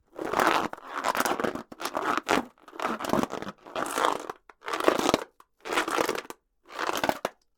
Balloon Scrunch Multiple 2
Recorded as part of a collection of sounds created by manipulating a balloon.
Ballon, Footstep, Leaf, Multiple, Rubber, Stretch